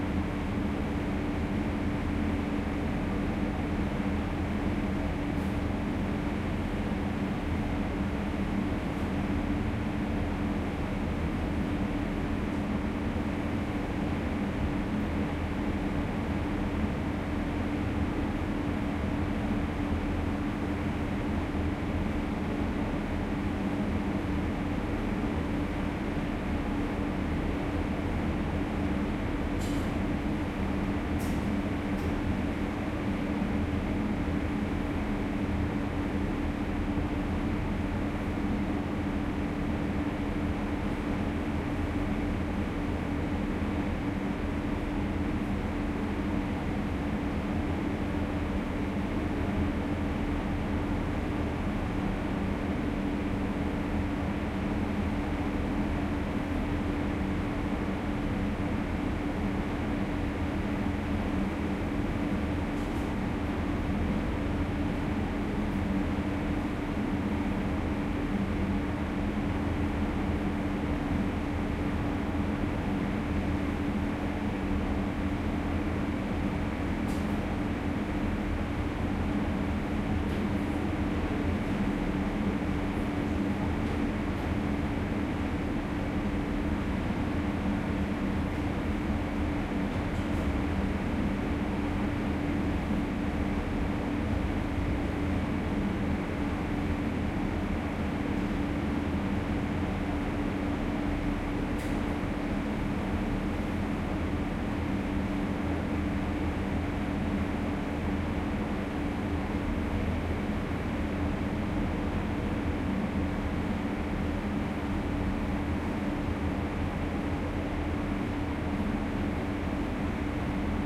170714 StLFerry Underway Deck R
On deck aboard a Baltic Sea car ferry bound from Rostock/Germany to Trelleborg/Sweden. It is late evening, and the ferry is cruising clear of the harbor into the open sea. The recording features the ambient noise on deck, mainly the drone of the ship and it's diesels, some sea in the background, and the occasional door opening and closing.
Recorded with a Zoom H2N. These are the REAR channels of a 4ch surround recording. Mics set to 120° dispersion.
Baltic, cruise, drone, ferry, field-recording, large, maritime, ocean, rear, sea, ship